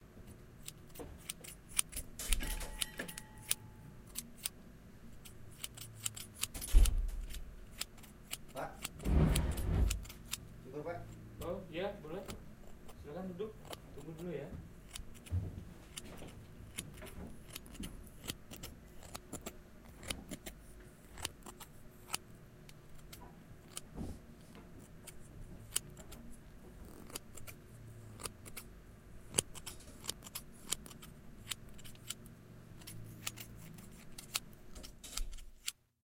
Bunyi no.7 gunting rambut ver 2
hair cut ambience
haircut, cut, hair, barber, clippers